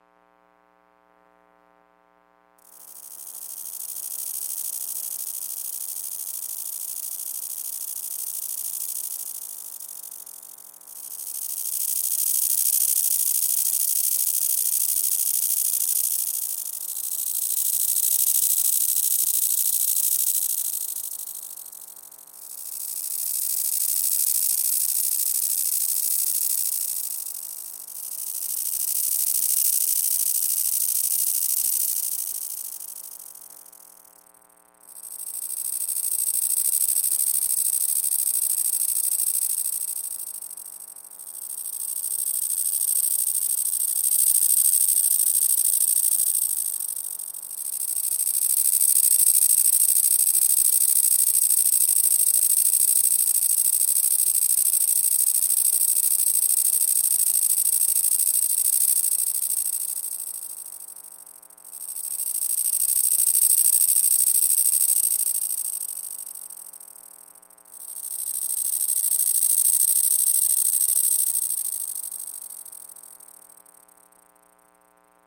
A cicada's stridulation was modeled digitally using a variant of granular/pulsar synthesis known as glisson synthesis.
bioacoustic, bioacoustics, cicada, communication, glisson, glisson-synthesis, granular, granular-synthesis, insect, pulsar, pulsar-synthesis, stridulation, synthesis